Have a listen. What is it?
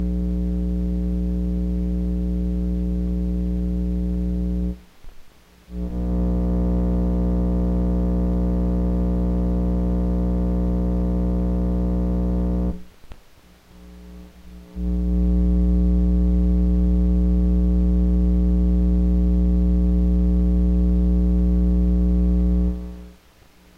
Phone transducer suction cup thing on bottom and back of Alesis QS6.1 synthesizer.
buzz, hum, transducer, electricity, magnetic, electro